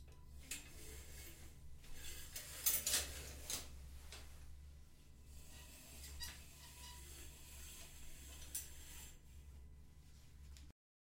Shower Curtain Slow
A shower curtain being opened slowly.
bathroom, curtain, shower-curtain